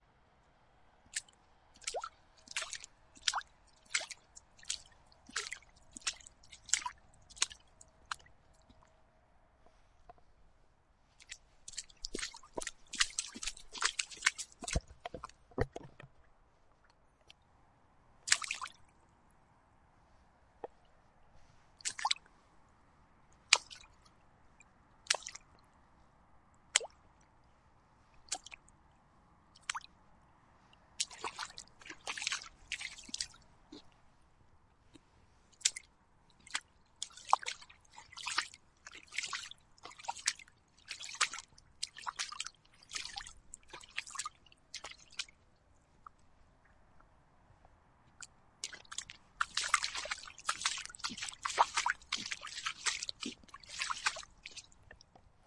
fun with a water puddle
walking through a water puddle in two tempi, some splashes and floundering about in the water
footsteps, puddle, splash, steps, walk, water